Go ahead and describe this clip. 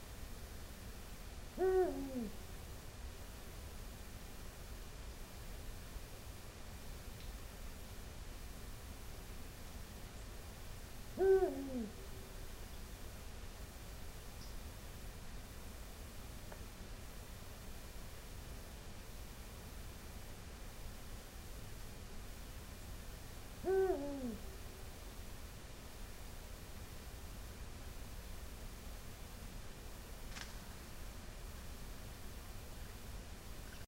eagle owl1
Another recording made from our bathroom window which faces a stand of Eucalyptus trees. This time late at night when all was still. The haunting sound of an Eagle Owl in one of the trees. Listen carefully towards the end of the sample and you will hear its wing catch some leaves as it takes off. Recorded on a Panasonic Mini DV Camcorder with a cheap electret condenser microphone.
bird, calls, eagle, owl, owls, spain, spanish